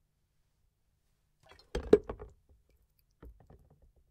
Just someone dropping something into water